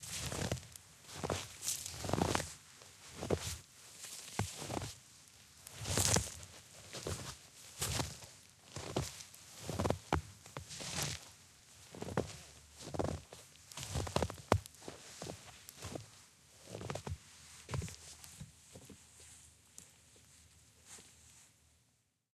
Floey recording of walking in the snow.